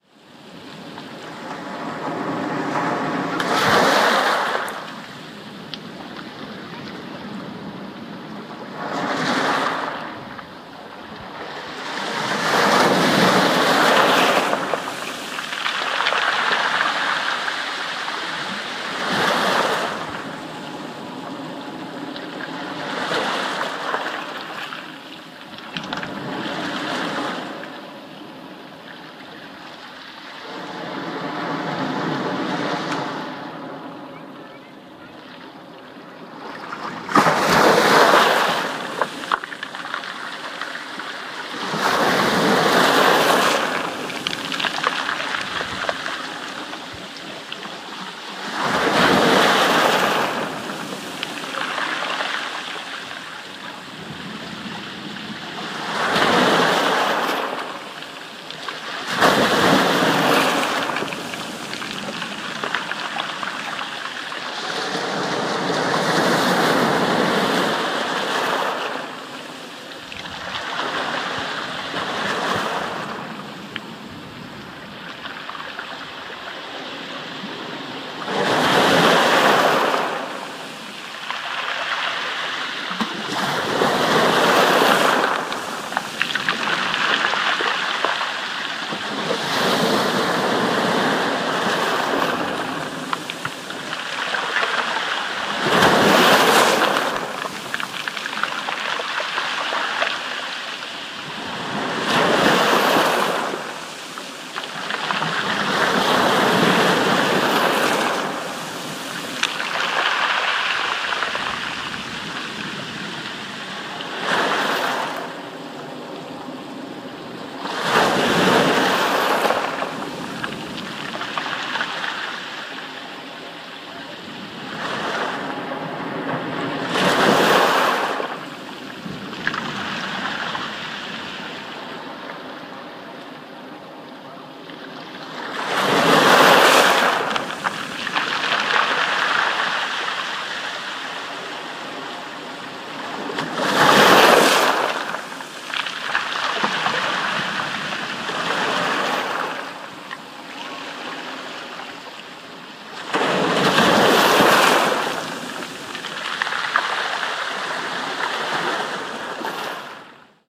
Close recording of medium-sized waves washing up onto a stony beach. The stones create a very distinctive sound when the waves wash up onto them. Windy weather. Withernsea Beach, East Yorkshire 28 May 2015. Recorded with a 5th generation iPod touch.